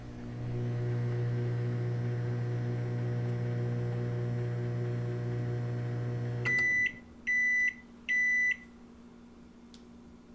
A microwave finishing its time.
Beep, Microwave, Sound-effect